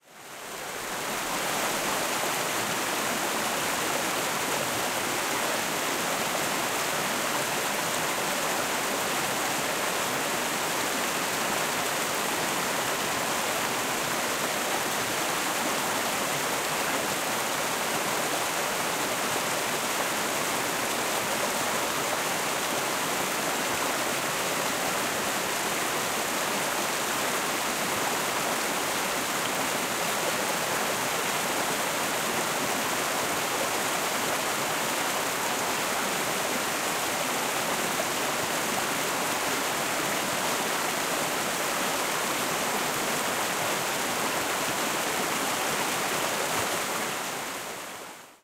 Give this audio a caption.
Water Flowing Through Close Rapids 1
Close field recording of water flowing through some rapids in a creek.
Recorded at Springbrook National Park, Queensland using the Zoom H6 Mid-side module.
gurgle, flow, brook, creek, stream, water, field-recording, flowing, river, trickle, liquid, close